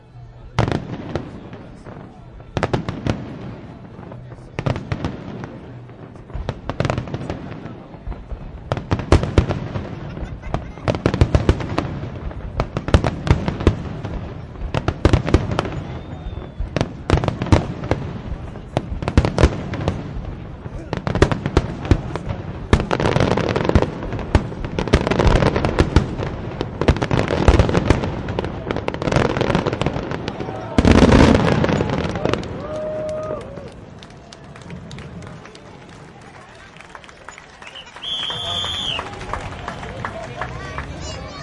fireworks climax +slapback echo reflection1 Montreal, Canada
fireworks, Montreal, echo, reflection, Canada, slapback, climax